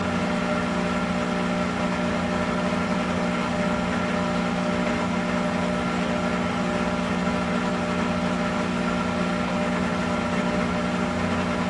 washing machine wash3 cycle4
During the wash cycle.
industrial, water, cycle, washing, wash, machine